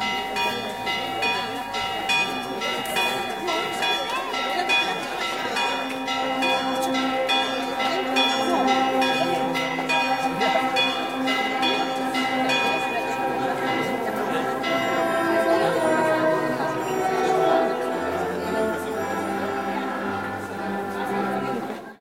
A brief clip from my nephew's wedding, just as the bride enters the church. He's a professional musician
(percussion), friends from various bands came to play at the wedding.
Recorded with the built-in stereo mic of a mini-DV camcorder.
bells, wedding-march